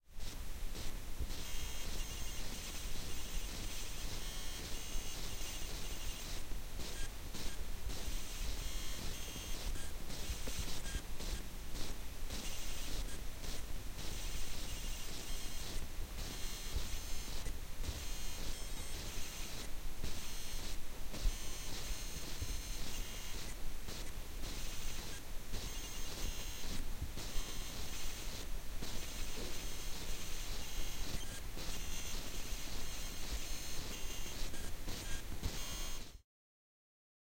Subtle noise made by a Bose wireless speaker while on stand-by: static noise accompanied by repetitive blows and low electronic beeps.